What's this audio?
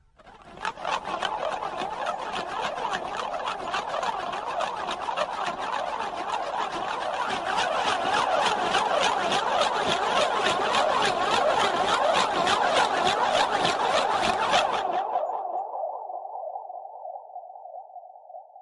Alien Ship
Original was me shaking a cereal box. Edited in Audition. Recorded on my iPhone8.
approach, engine, alien, bakground